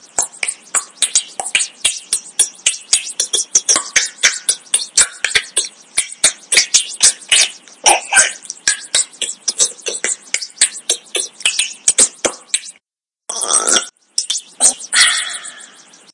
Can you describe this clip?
I used my voice to create this sound. Indeed, I generated different sounds with my mouth which i recorded. I changed the tempo, and added an amplification to my track. I took the "wahwah" effect for creating something nice. Around the end of my track, i created a fade out.